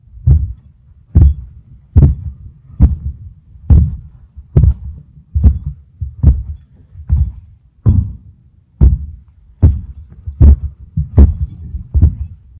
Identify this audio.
Godwalking [SLOW]
Horror, Terror, Halloween, Freaky, Atmosphere, Evil, Scary